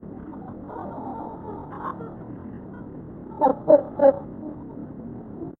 To me it sounds like a combination of a dog and bird.
and i just customized it a little bit with my knowledge and turned it into something else.